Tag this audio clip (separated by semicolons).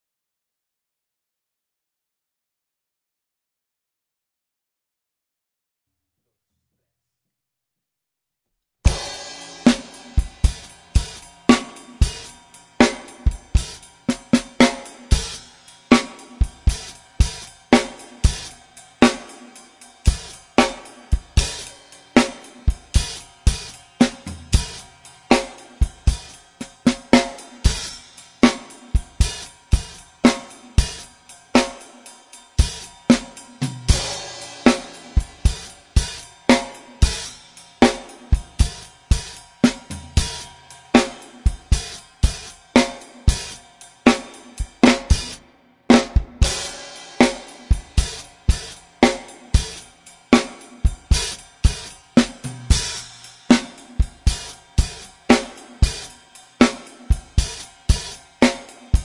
progressive; plates; ride; drums; pearl